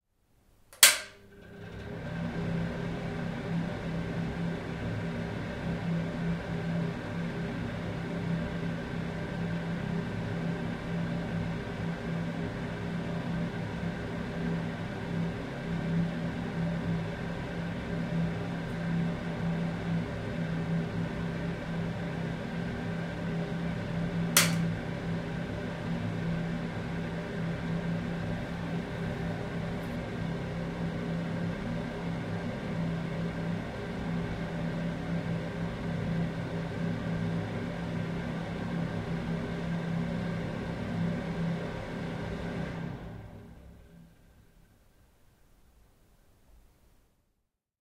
Switch on and off an extractor in the toilet
Zoom H6 recording
extraction, extractor, fan, hum, humming, switch-off, switch-on, toilet, vent, ventilation, ventilator